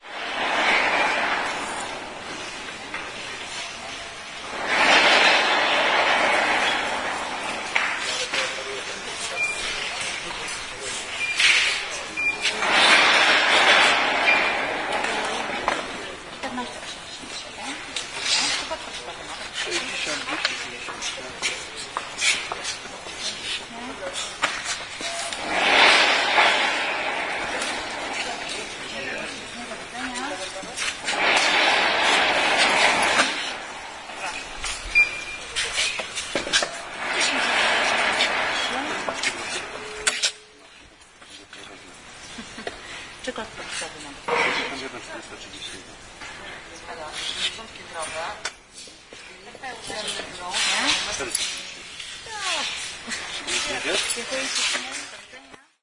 05.12.09: about 17.00 in the market with building materials called Brico Depot (in Panorama Commercial Center in Poznan/Poland). I was standing in a queue: the sound of the cash desk, money, short conversations between a checker and clients. The most audible is the sound making in an electric section: the stuff is rolling up some cables.
no processing (only fade in/out)
beeping, brico-depot, buiyng, cash-desk, electric-section, field-recording, man, noise, people, poland, poznan, voice